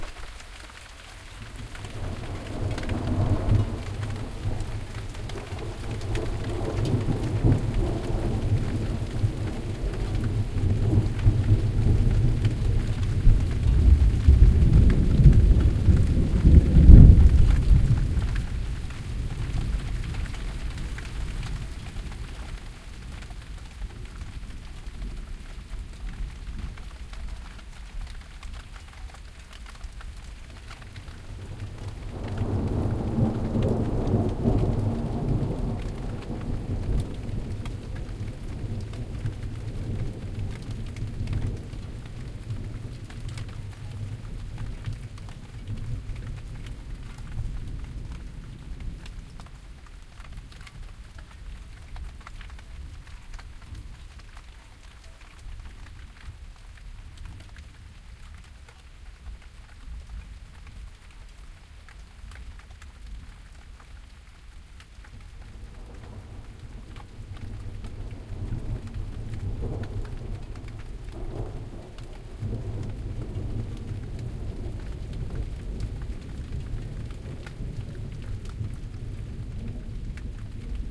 pluie-orage
orage en auvergne avec pluie qui tombe sur différents supports
thunderstorm, auvergne, lightning, storm, orage, pluie, rainstorm, thunder, rain